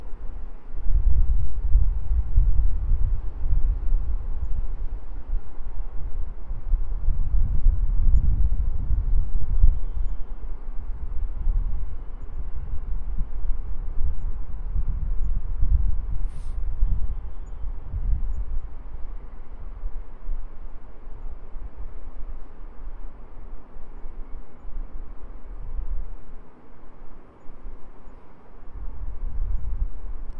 14-09-16 Estacion Juan B. Justo Norte
Field recording of a train station in Buenos Aires, Argentina.
cars, city, field-recording, street, train